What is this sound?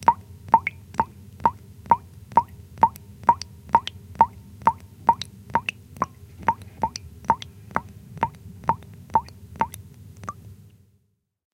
Sound of a dripping tap as recorded about 15 cm below the surface of the water directly under the impact point. Hydrophones were resting in the bottom of a metal sink.
drip, drop, environmental-sounds-research, experimental, foley, hydrophone, water, wet